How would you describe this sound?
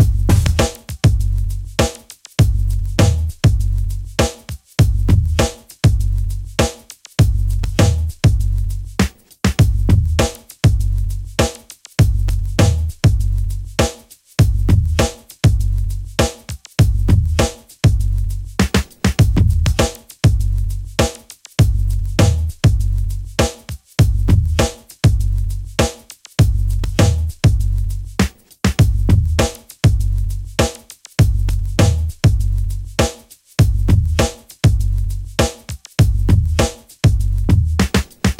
idm or downtempo drumloop with fills created by me, Number at end indicates tempo
drumloop, downtempo, loop, beat, drum, idm